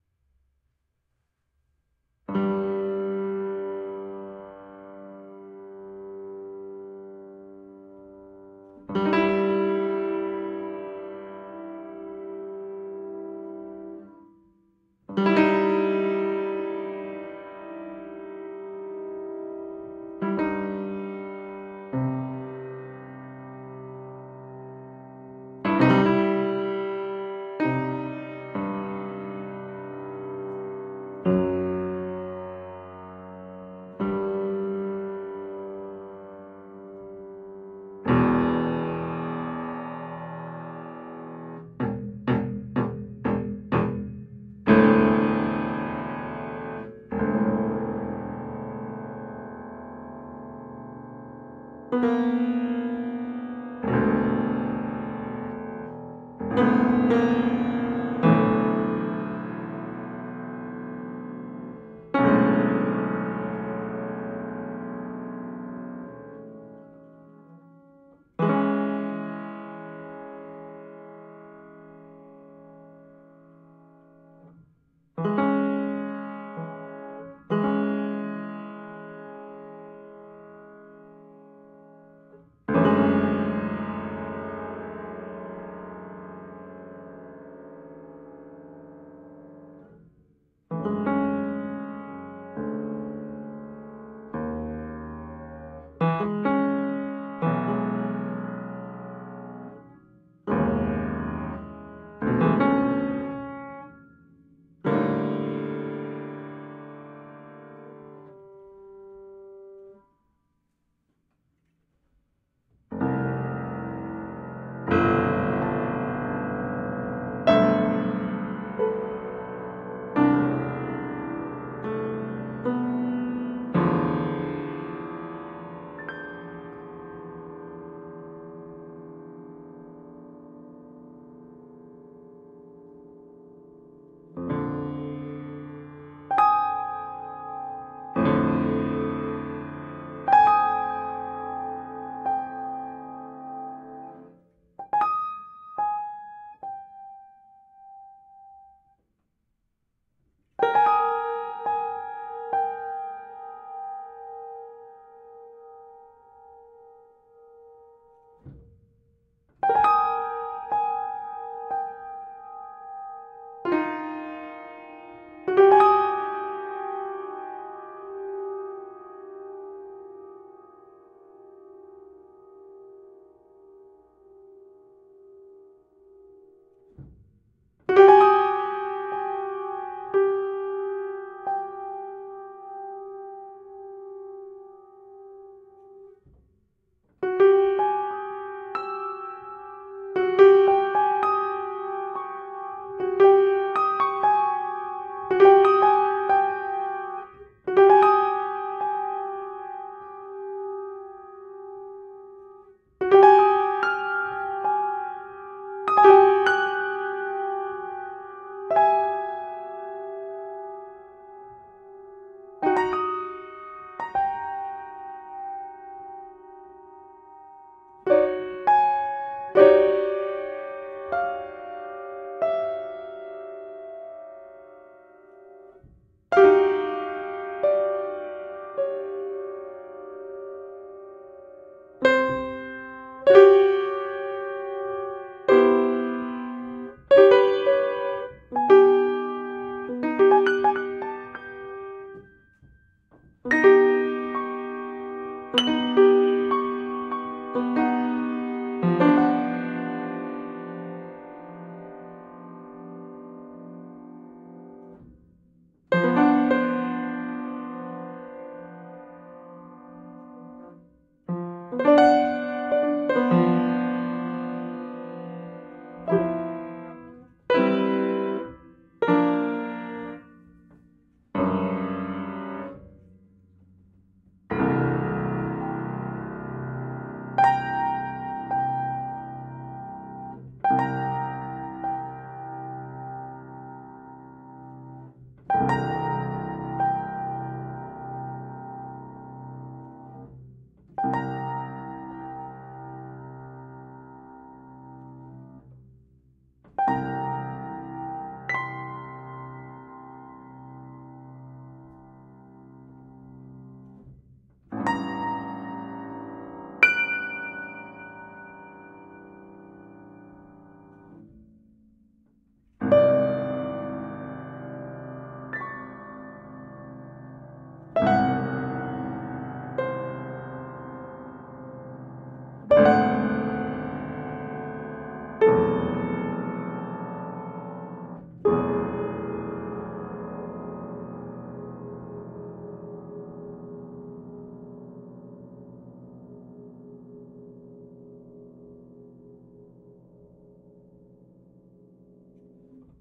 Upright Piano Dark Random 6
Playing around trying to make dark atmospheres with an upright piano. Recorded with RODE NT4 XY-stereo microphone going into MOTU Ultralite MK3.
close-mic, Rode-NT4, upright-piano, xy-stereo